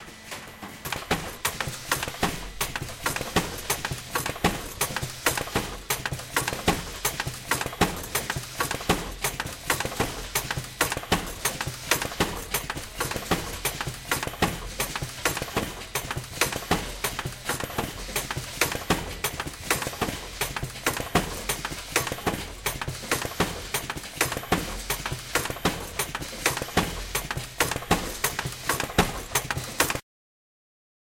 Pinning Machine 02
Automatic Pinning Machine installing small steel pins into steel strip at saw factory. Recorded with Edirol R44 recorder and Rode NT4 microphone.